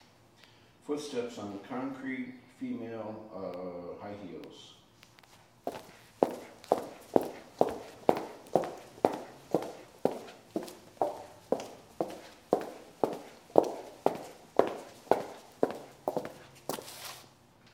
concrete female heels
Female in high-heels walking on concrete. Great for foley.